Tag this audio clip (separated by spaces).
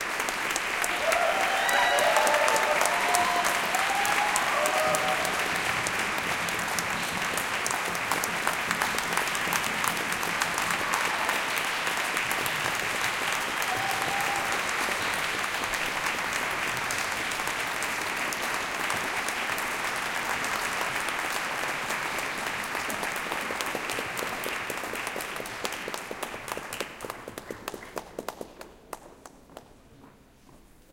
adults applaud applauding applause audience auditorium cheer cheering clap clapping claps crowd group hand-clapping theatre